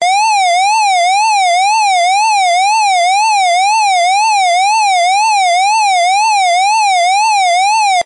Simple Alarm
This is the typical alarm siren sound you know from movies and games. 8-bit flavoured. Purely synth-crafted.
It is taken from my sample pack "107 Free Retro Game Sounds".
8bit, alarm, emergency, police, siren